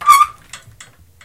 the sounds on this pack are different versions of the braking of my old bike. rubber over steel.
field-recording, rubber, bike